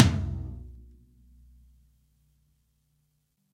Low Tom Of God Wet 015
drum, drumset, kit, low, pack, realistic, set, tom